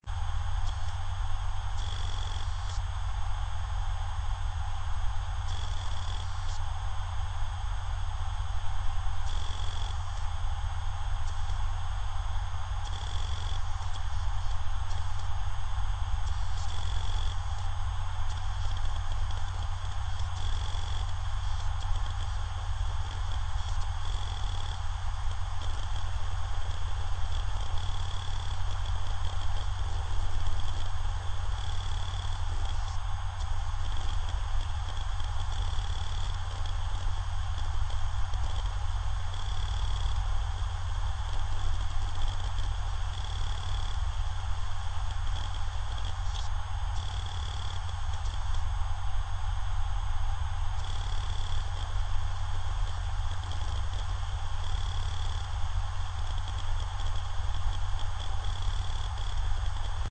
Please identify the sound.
HDD Random RW 3
HDD Random read and write